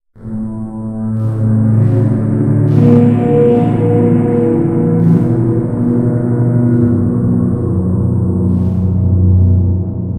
electric organ(spacey)
The organ sound processed with Gverb to give it the sound
of a larger room, like a music hall(but smaller).